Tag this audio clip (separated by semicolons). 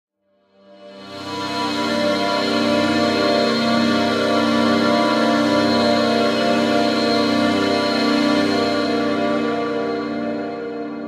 ambience; morphing; 130; atmosphere; long; liquid; melodic; pad; effects; wide; expansive; house; reverb; dreamy; 130-bpm; luscious; soundscape; evolving; progressive